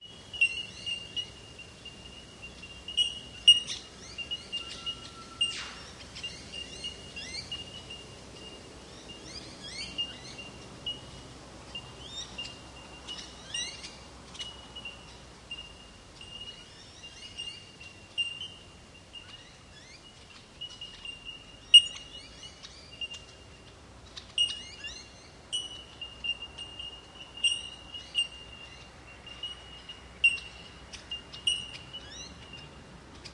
A recording of local wildlife (mostly bell birds) and some distant construction work.
Equipment: Zoom H2 using built in Mics.
Recorded at Mt Lindesay, Australia 14 July, 2011.